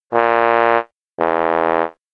A fail sound I used in one of my animations.
Created in 3ML Piano Editor.